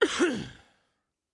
This is one of many coughs I produced while having a bout of flu.